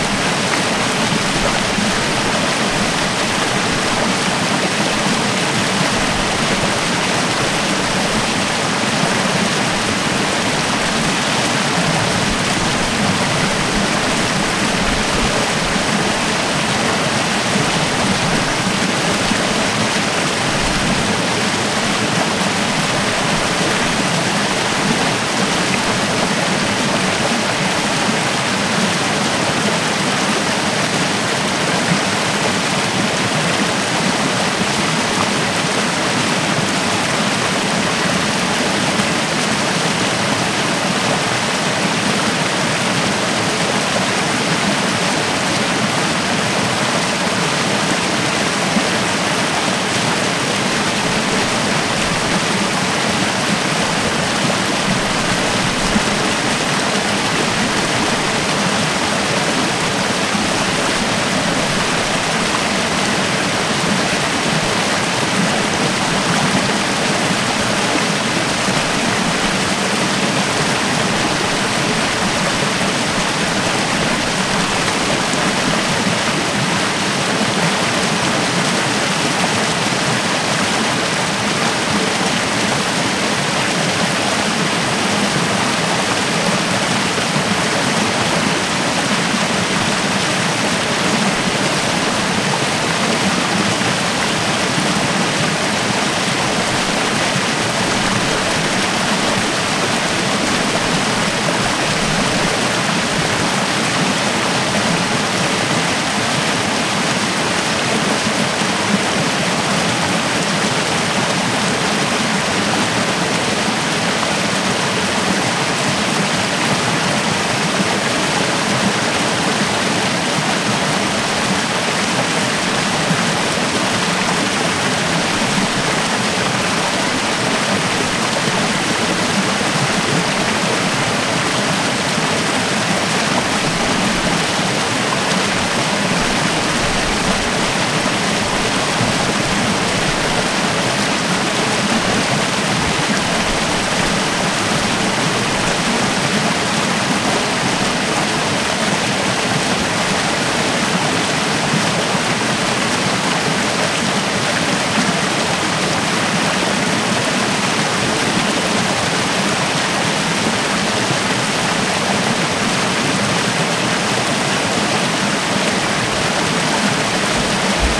Rainy river in the forest
Close up recording of river near Hora sv. Šebestiána in Czech Republic. It was at night, it was about to rain and it was a bit crazy, yeah.
First sound here, so hope it is not that bad. Recorded by Samson Q7 mic, M-Audio interface an Ableton Live.
No additional processing applied.
rain, River